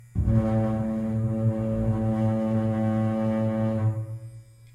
high electric smooth - high electric smooth
Electric shaver, metal bar, bass string and metal tank.
Repeating, electric, engine, metal, metallic, motor, processing, shaver, tank